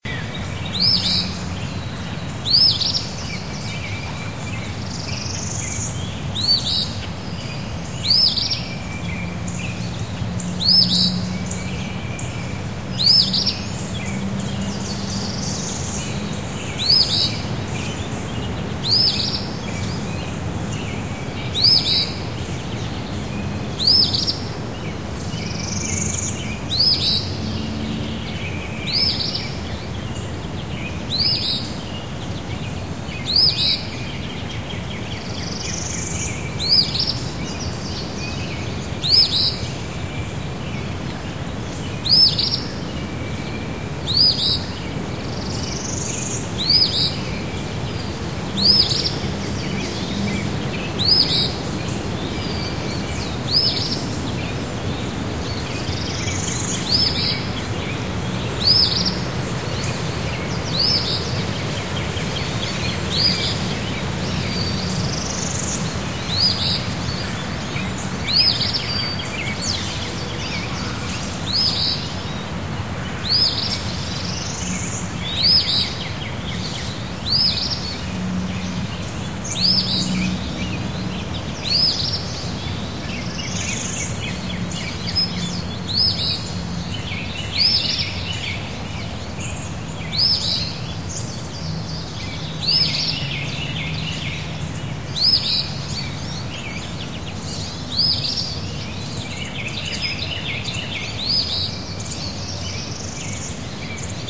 EasternPhoebeCreeksideApril10th2013LakeMurphysboro
A recording of an Eastern Phoebe, made near a creek in a typical Midwest Oak/Hickory/Sycamore forest.
This very unique, two-note call, which you may have heard before, is, in my opinion, very beautiful despite it's short duration. Despite this bird's non-colorful plumage, his song makes up for it!
Recording made on April 10th, 2013 around 6:45 in the morning about 15 feet from a small creek. My recorder, the Zoom H4N, was mounted on a tripod in the middle of the creek. I was using the H4N's built-in microphones. Volume level was 80 (on a scale of 100 being the maximum)